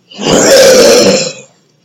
A self-recorded mighty roar.